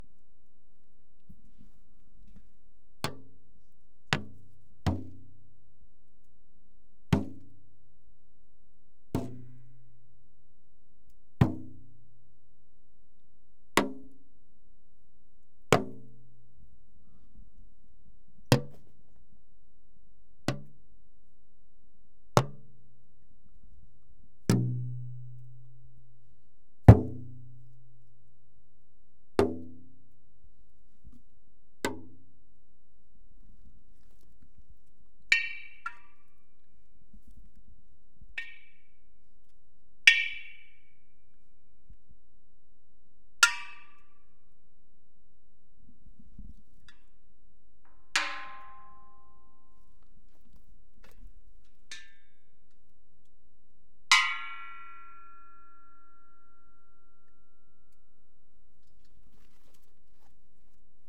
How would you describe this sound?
20131009 plastic buckets + propane tanks
a series of hits on a plastic bucket produce a variety of tones:
flat thuds
"tom-like" head sounds
higher-pitched rim hits on the edge of the bucket
deep, warm, hollow percussive thumping
a series of hits on a 100 and 20 lb. propane tanks produce reverberant metallic, percussive ringing sounds.
metal, ringing, percussion, clang, metallic, plastic-bucket, percussive, propane-tank, drum, sample